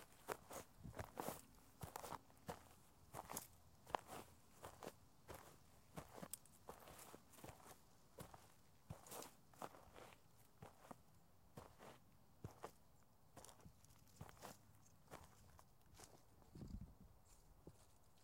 Footdrags Dirt
Standing in place kicking dirt to simulate walking or running.